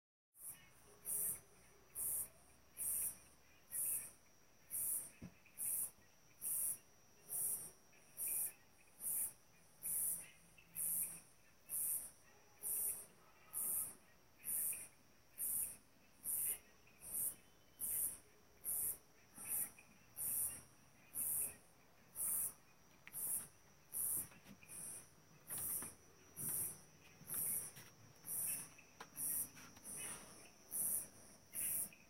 Bosque ambiente
sonidos de grillos y ranas
ambience, forest, sound